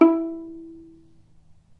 violin pizzicato "non vibrato"